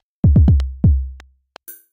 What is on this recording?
Rhythmmakerloop 125 bpm-43
This is a pure electro drumloop at 125 bpm and 1 measure 4/4 long. A variation of loop 42 with the same name. An electronic kick, some side sticks and a single hihat or cymbal. It is part of the "Rhythmmaker pack 125 bpm" sample pack and was created using the Rhythmmaker ensemble within Native Instruments Reaktor. Mastering (EQ, Stereo Enhancer, Multi-Band expand/compress/limit, dither, fades at start and/or end) done within Wavelab.
125-bpm, drumloop, electro